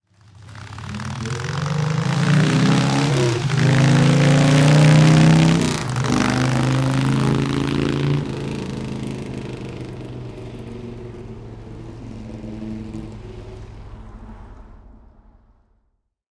Harley Davidson XLCH 1974 1
Harley Davidson XLCH 1974, 1000 cc, during riding recorded with Røde NTG3 and Zoom H4n. Recording: August 2019, Belgium, Europe.
1974, Harley-Davidson, Motorcycle